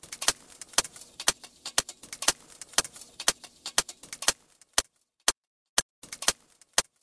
Metal Cracking Hi-Hat Rhythm
Glitchy cracking metallic hi-hat pattern.
sparkling, cracking, loop, idm, cracks, metal, spark, glitching, hi-hats, electric, ripping, pattern, electrical